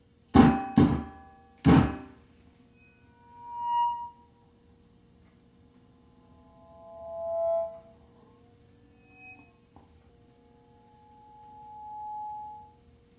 This is an alternate "cliche" sound of someone blowing onto a public address microphone before speaking. The feedback on this one is a bit louder than (1) and (2)
I used an EV635 microphone hooked up to an amp. The PA speaker is positioned incorrectly behind the microphone, the volume is too loud, the EQ is off and the room is live - so there's a ringing or feedback.
I took the AKG condenser microphone out of the audio booth and brought it into the studio to record the amp set-up. Encoded with M-Audio Delta AP